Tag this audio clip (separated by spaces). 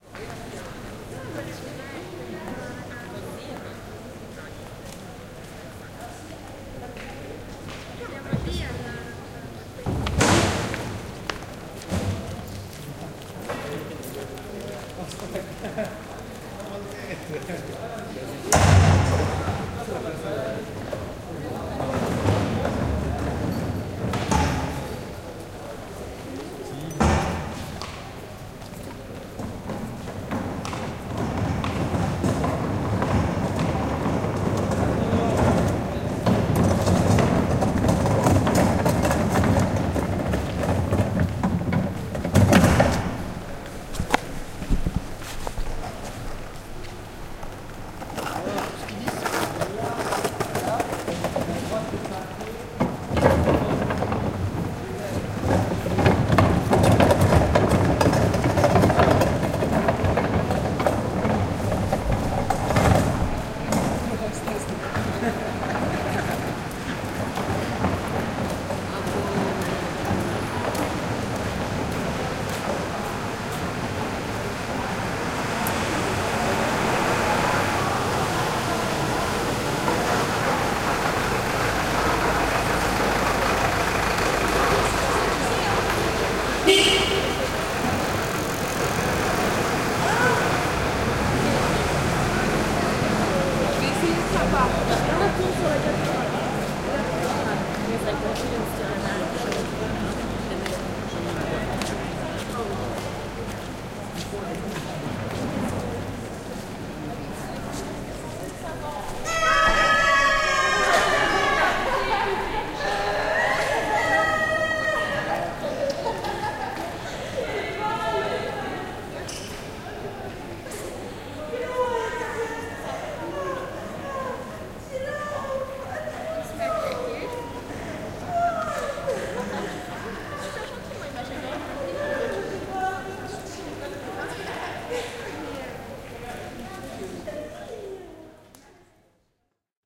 square field-recording Barcelona Pla roll